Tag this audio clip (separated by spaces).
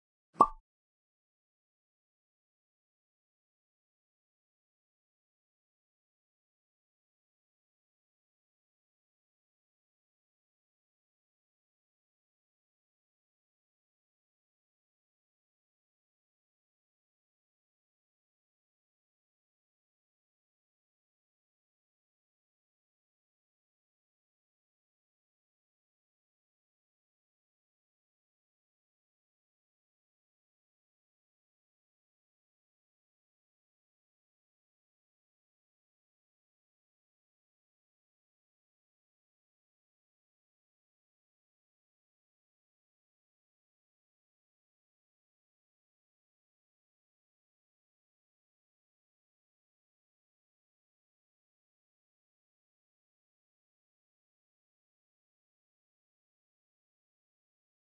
interactions player recording